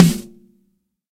BDP SNARE 003
Snare drums, both real and sampled, layered, phase-matched and processed in Cool Edit Pro. These BDP snares are an older drum with a nice deep resonance. Recorded with a Beyer M201N through a Millennia Media HV-3D preamp and Symetrix 501 compressor.